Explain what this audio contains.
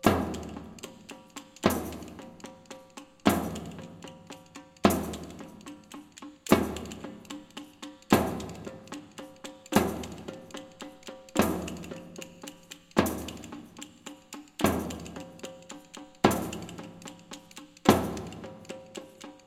SPANISH DRUM EDIT 1a
(Additional) Music by Christopher Peifer
beat, castanets, drums, hand-drum, loop, percussion, shaky-egg, Spanish